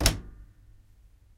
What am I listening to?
The sound of closing the door of my washing machine.